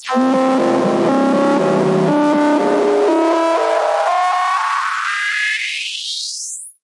A dirty distorted rise type sound created using Access Virus C and third party FX